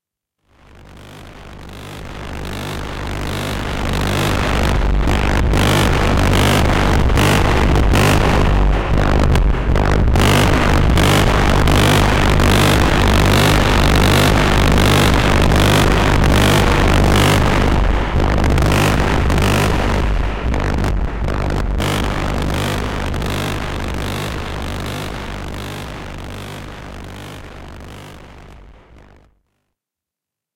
Scifi Synth Drone 306f
From series of scifi effects and drones recorded live with Arturia Microbrute, Casio SK-1, Roland SP-404 and Boss SP-202. This set is inspired by my scifi story in progress, "The Movers"
arturia, dronesound, noise, microbrute, sp-404, drone, casio, roland, synthesizer, sk-1, sp-202